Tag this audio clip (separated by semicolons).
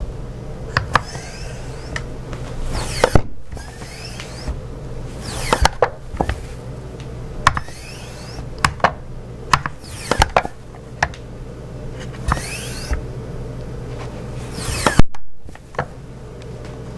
lower; lift; chair; office